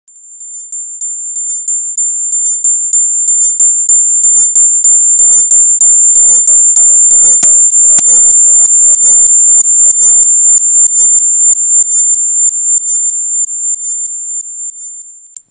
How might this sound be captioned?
Extreme frequency for testing your ears and tweeters
ear, high, test, tones